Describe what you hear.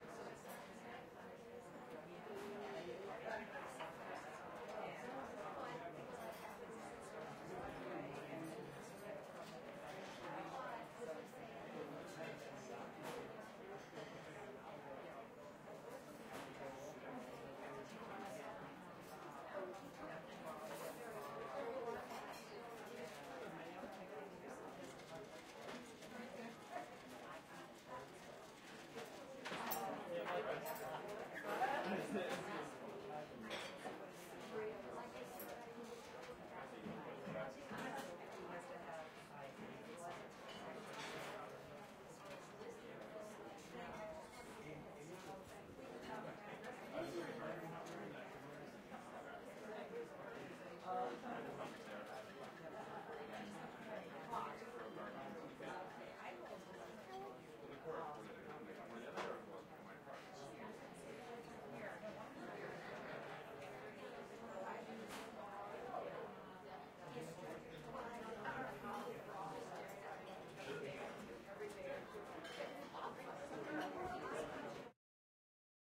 Harry's Roadhouse, Santa Fe, New Mexico lunch crowd walla. Recorded with Tetramic ambisonic microphone, b-format *NOTE: you will need to decode this b-format ambisonic file with a plug-in such as the (free)SurroundZone2 which allows you to decode the file to a surround, stereo, or mono format. Also note that these are FuMa bformat files (and opposed to AmbiX bformat).